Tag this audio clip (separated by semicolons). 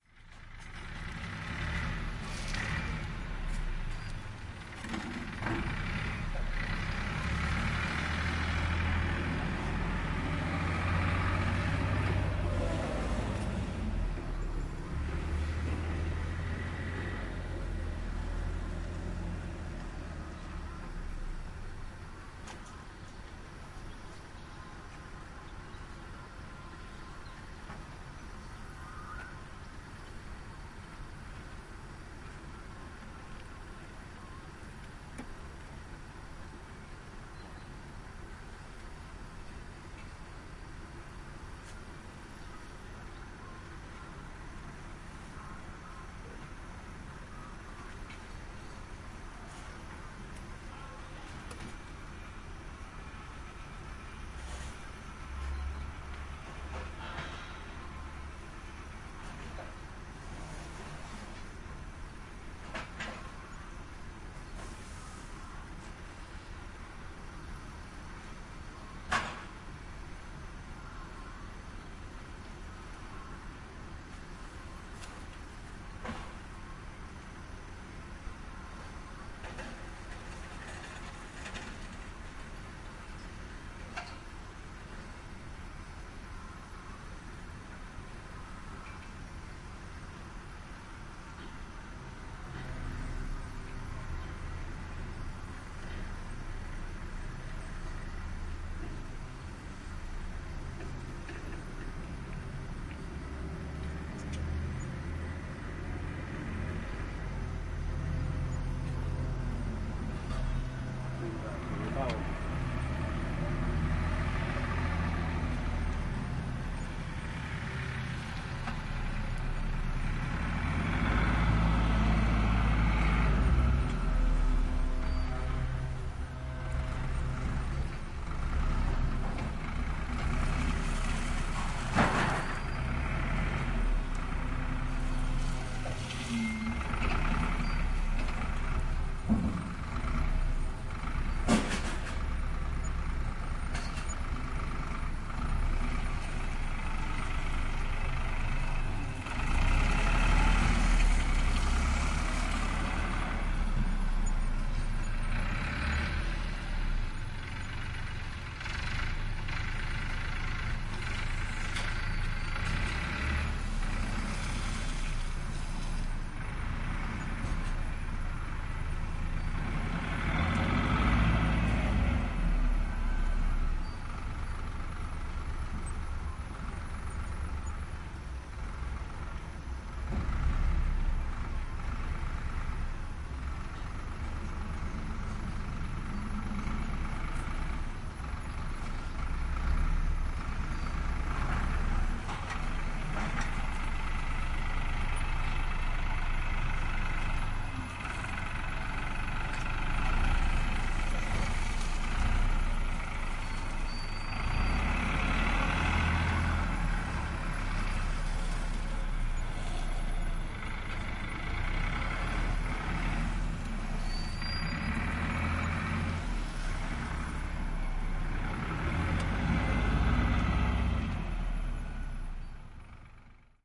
engine; field-recording; forklift; noise; rumble; tractor; unload; whirr